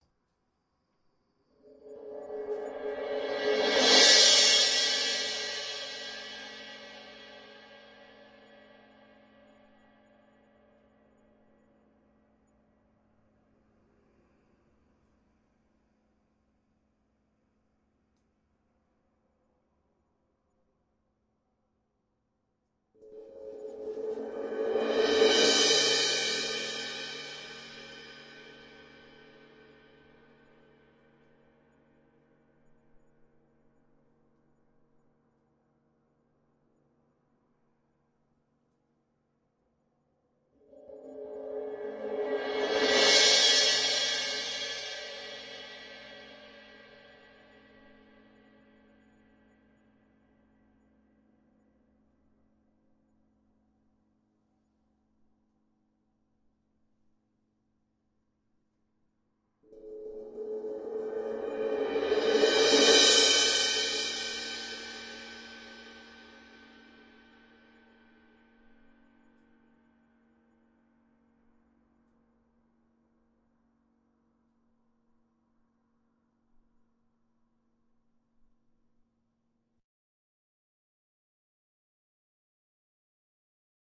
cymbal swells kevinsticks
Zildjian K's, a few different things I did with mallets
crescendo, cymbal, roll, swell